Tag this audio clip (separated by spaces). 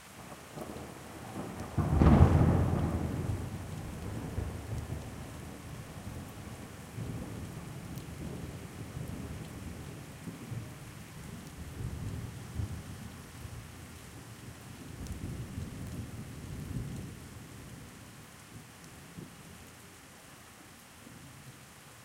thunder thunderstorm field-recording raining weather nature rain hail wind strike